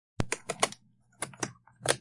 USB insert
Putting flash drive into usb port on laptop, clicking sounds.
usb, accessory, thumb-drive, flash-drive, COMPUTER